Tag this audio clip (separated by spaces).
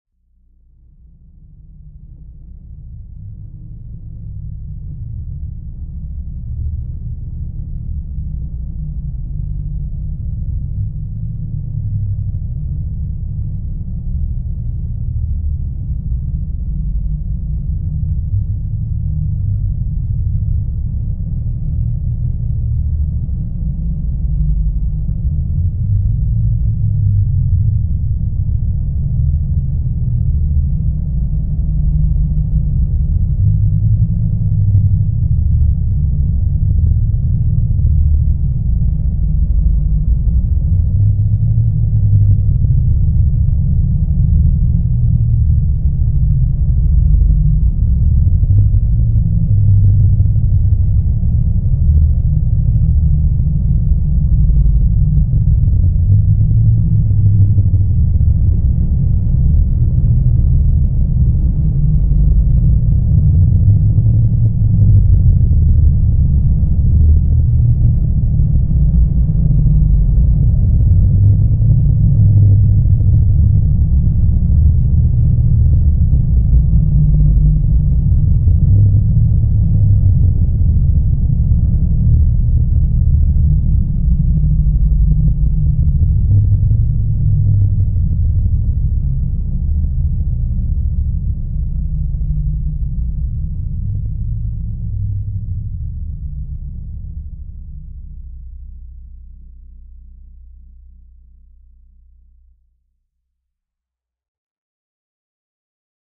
ambiance filmscore film low score design bass spaceship ufo synth synthesizer space dark boom sci-fi cinematic big frequency boomer